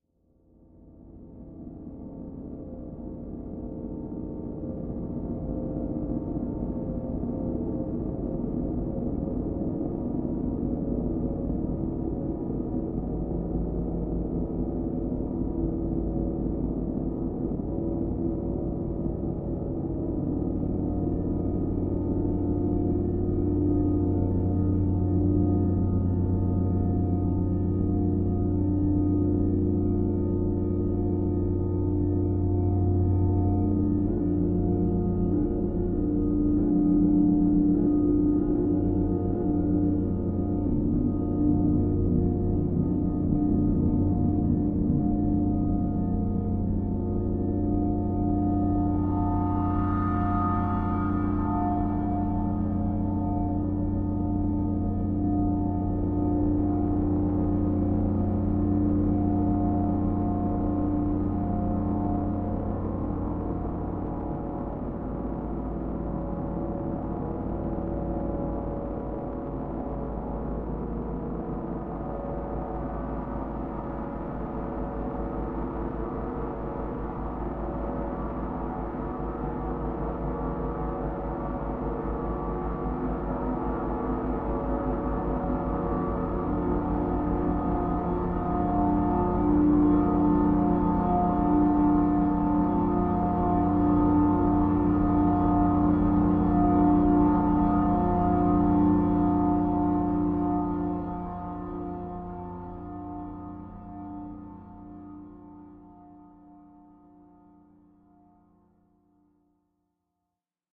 A longish drone with increasing noise and distortion. Well you love 'em or you hate 'em. Part of my Strange and Sci-fi 2 pack which aims to provide sounds for use as backgrounds to music, film, animation, or even games.
ambience, atmosphere, cinematic, dark, distortion, drone, electro, electronic, music, noise, processed, synth